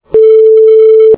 A pure tone with a frequency of 440 hertz for one second. Made using Wolfram Mathematica.
monotone, sine, wolfram-mathematica